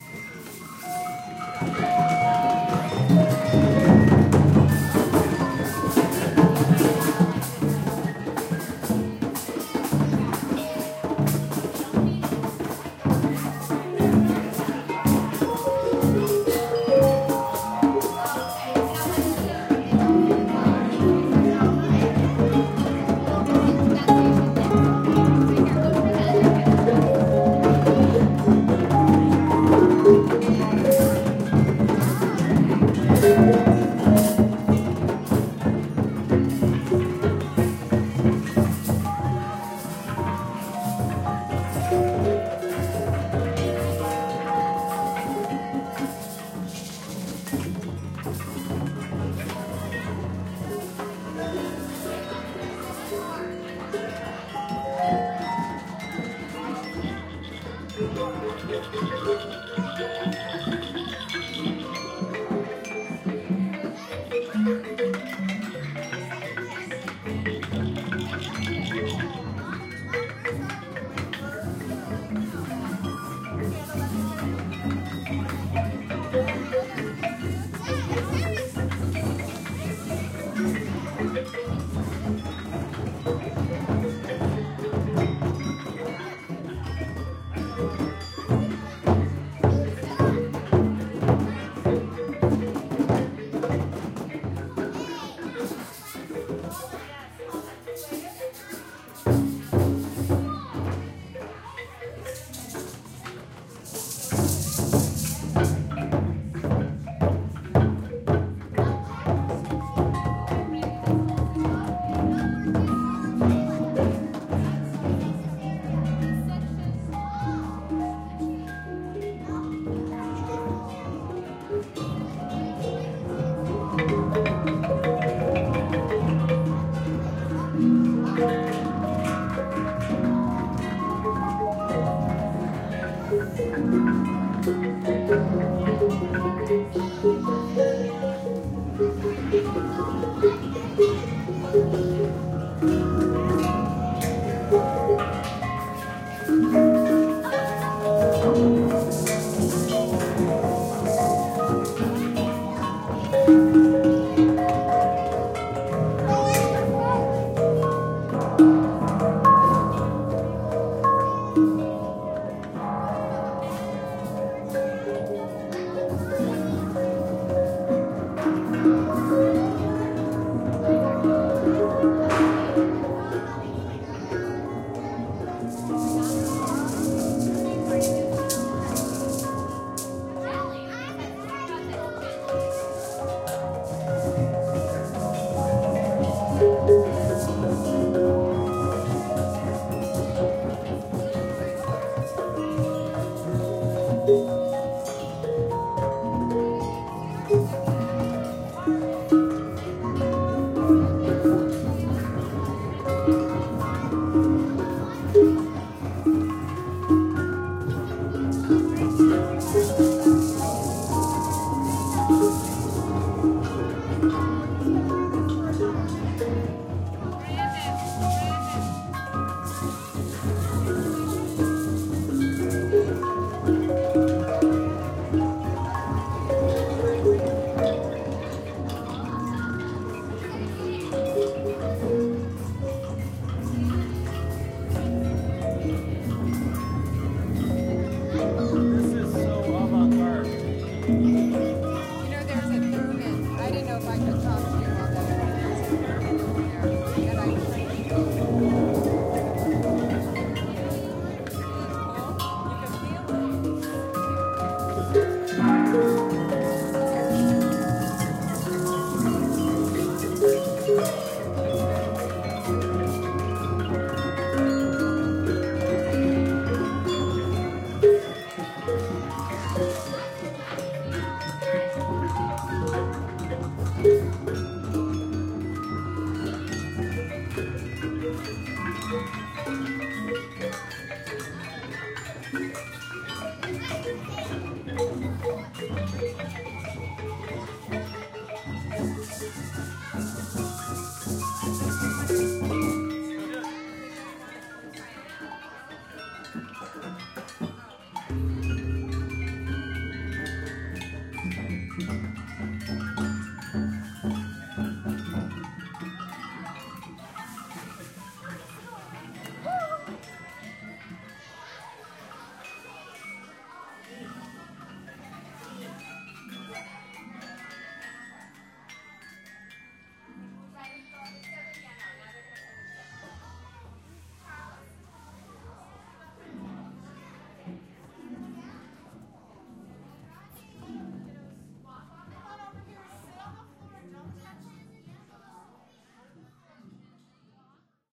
Chaos Music at MiM
This is a field recording from inside the "Experience Gallery" of the Musical Instrument Museum (Phoenix, Arizona). The Experience Gallery is full of gongs, bells, drums, gamelan instruments, stringed instruments, marimbas, xylophones, and even a theremin. A schoolgroup of children were let loose at the time of this recording.
Recorded in stereo with a Sony PCM-D50 (onboard mics, wide pattern) while in motion.
marimbas, gamelan, chaos, bells, musical-instruments, gongs, children, bedlam, noise, guitars, random, kids, strings, museum